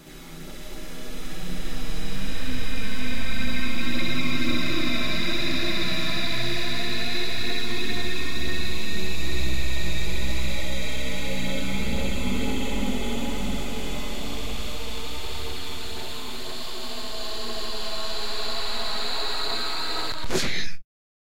Super Sneeze
Me sneezing slow but at the end normal speed. Made in Wavepad